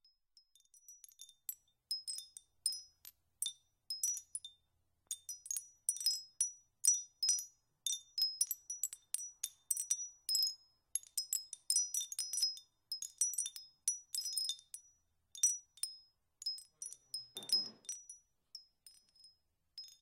After 12 years it was time to contribute to this wonderful website. Some recordings of my mother's wind chimes.
They are wooden, metal, or glass and i recorded them with a sm7b, focusrite preamp. unedited and unprocessed, though trimmed.
I'll try to record them all.
knock, unprocessed, wind-chime, metal, windchime